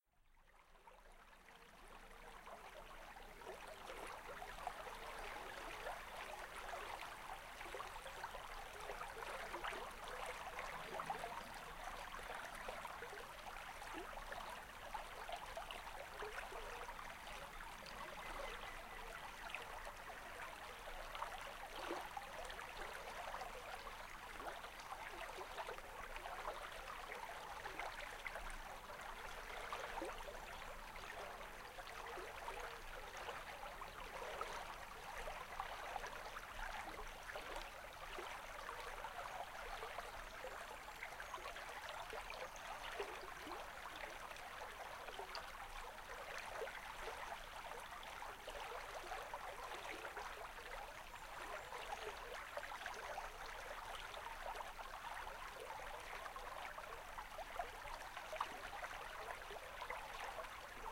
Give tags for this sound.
ambience
ambient
babbling
birdsong
brook
bubbling
Cotswolds
creek
England
field-recording
flow
flowing
gurgle
gurgling
liquid
nature
relaxation
relaxing
river
splash
stream
trickle
trickling
water
woodlands
woodpecker
woodpigeons

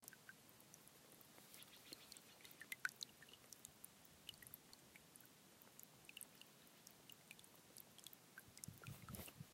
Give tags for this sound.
Nature
Stream
Ambience
Water
Ambient

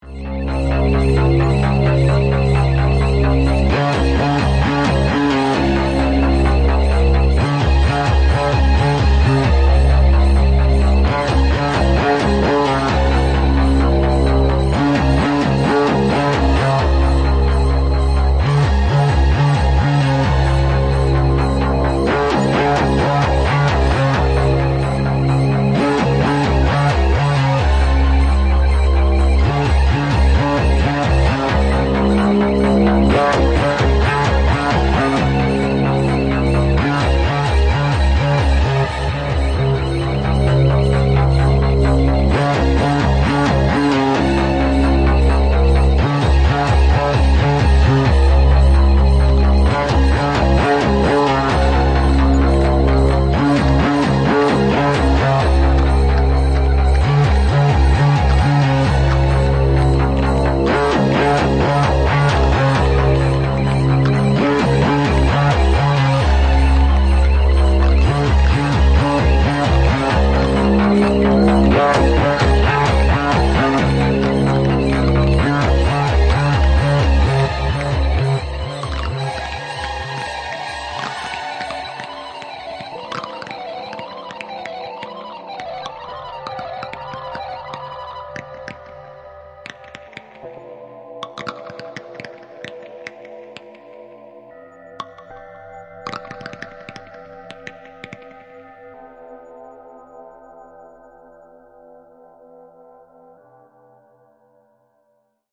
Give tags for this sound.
Soundtrack
Movie
Game

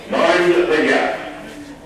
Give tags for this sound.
field-recording
london-underground
underground
train
tube
mind-the-gap

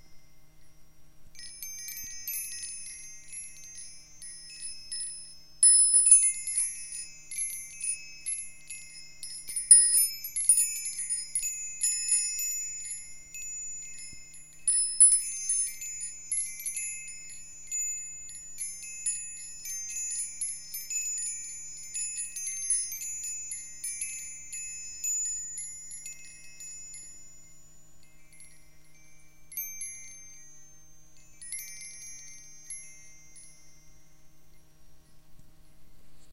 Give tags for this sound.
metal
sound
wind-chimes
bright
ring
wind

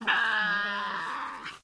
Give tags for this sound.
tasmanian-devil
cry
field-recording
tasmania
tasmanian-devil-conservation-park
grunt
sarcophilus-harrisii
stereo

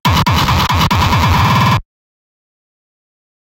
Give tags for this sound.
o
h
pink
y
processed
t
l
deathcore
fuzzy
e
k
glitchbreak
love

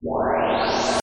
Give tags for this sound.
bandaaberta
clang
galaxias
hit
metal
metallic
openband
percussion
steel

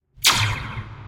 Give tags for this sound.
gun
laser
sci-fi
shoot
shooting
slinky
space
weapon

